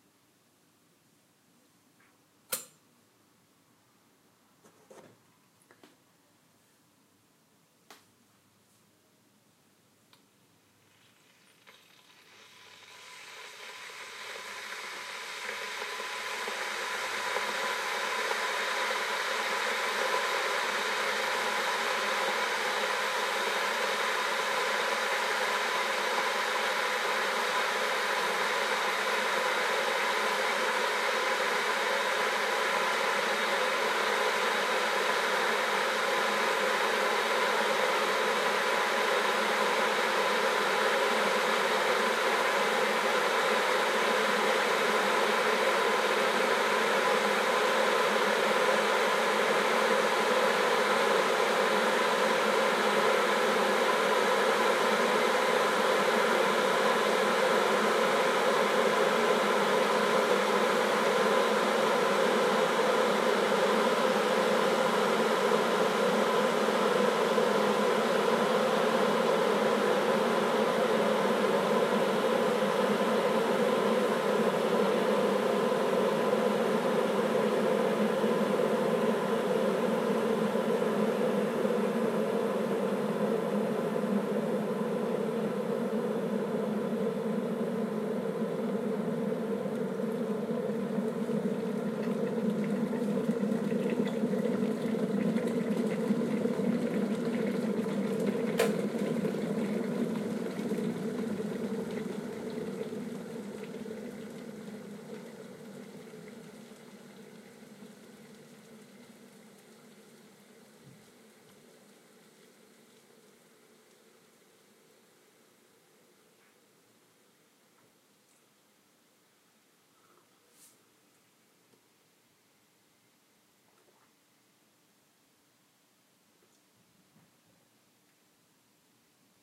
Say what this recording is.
Kenwood Kettle Boiling
Boiling Kettle
Recorded on an iPhone 4S with a Tascam iM2 Mic using Audioshare App
Kettle, Tascam-iM2